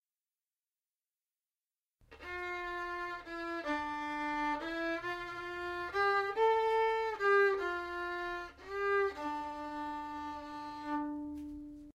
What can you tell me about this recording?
A little melody from violin on D string and reaching the A note.